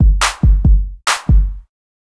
bass clap line